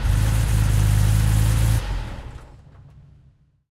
Porsche off growl
sports, ignition, automobile, car, engine, vehicle